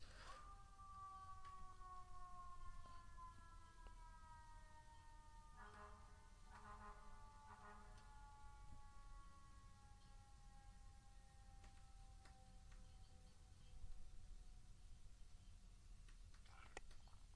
clip of firetruck siren heard in the distance